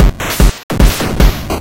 150bpm.PCMCore Chipbreak 2
Breakbeats HardPCM videogames' sounds
hi, cpu, stuff, chiptune